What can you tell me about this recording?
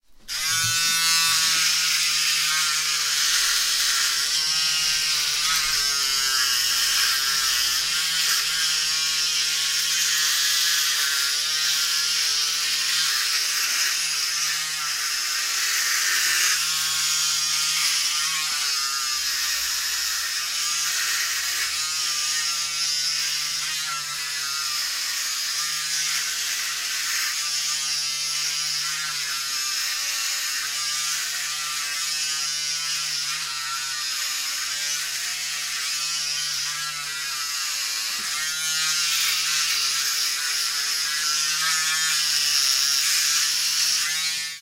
Electric razor shaving a face
electric, razor